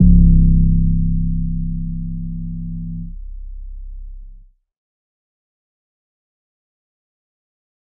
Some self-made 808s using various synthesizers.
808, distorted, fat, heavy, long, trap